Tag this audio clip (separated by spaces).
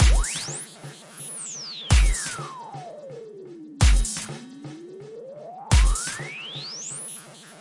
techno Glubgroove samples house dance trance club